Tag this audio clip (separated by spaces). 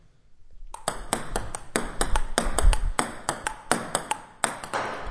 ball tabletennis tabletennis-game